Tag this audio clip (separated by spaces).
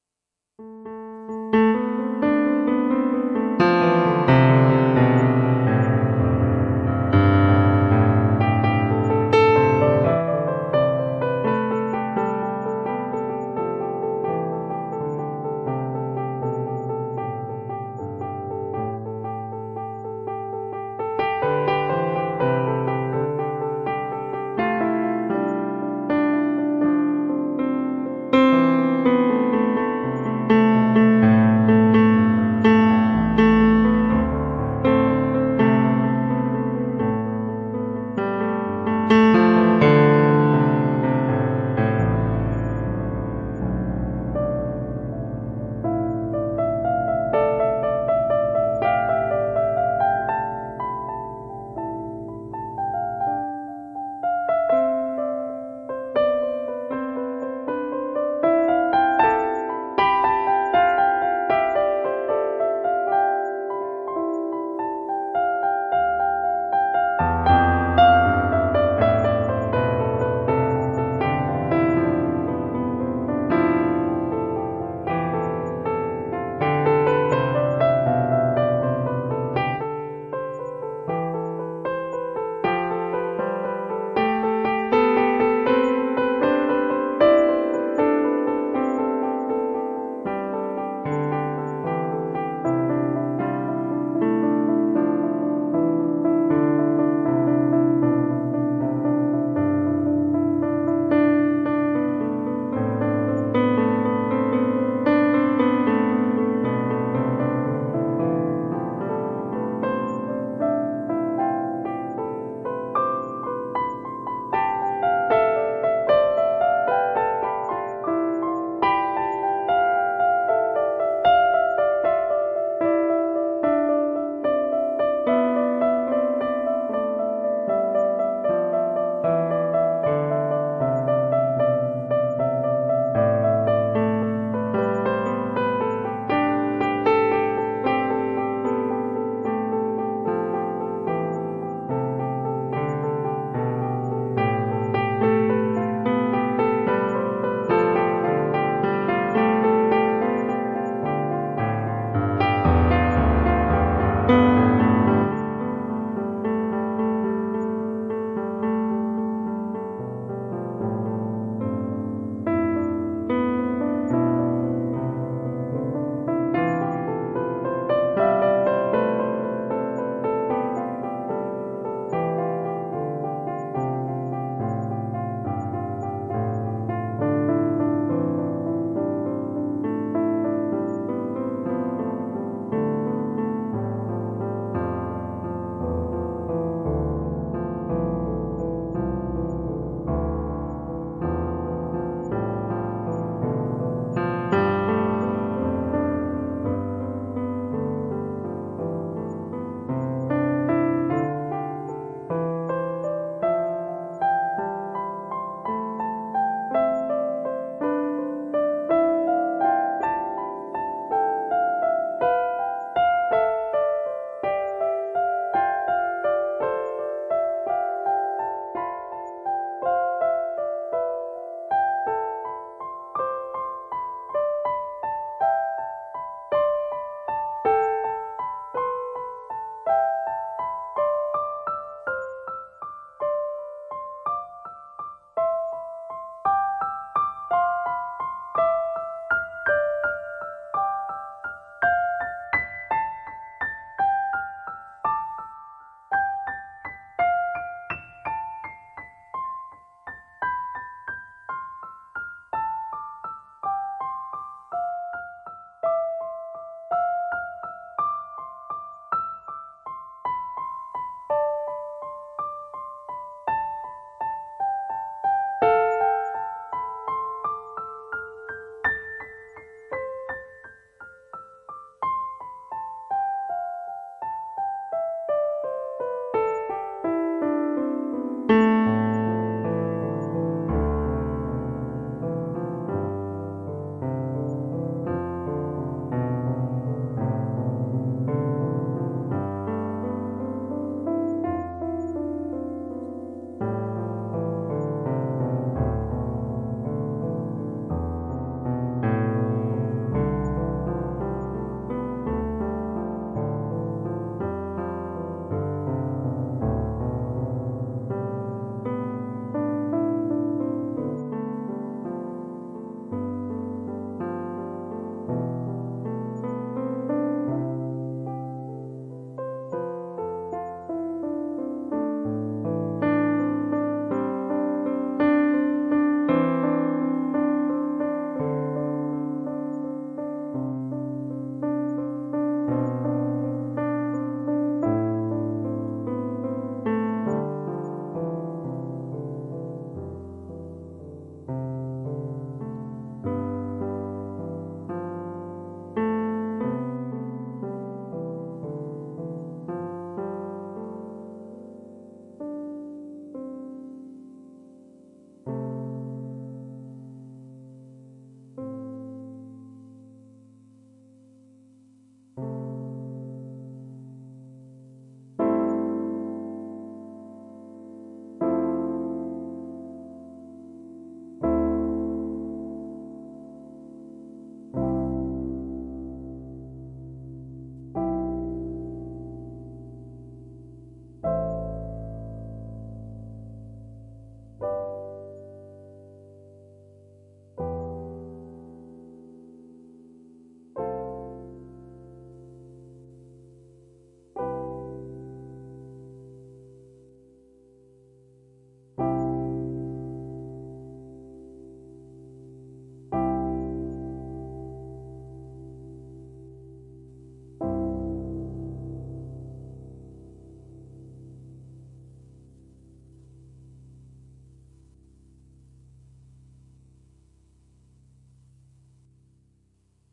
movie dream improv film dreamscape piano soundtrack chill mellow